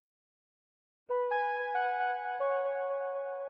Few notes played on software synthesizer
Please check up my commercial portfolio.
Your visits and listens will cheer me up!
Thank you.
4-notes, digital, lead, mel, notes, pad, sample, soft, synth, synthesized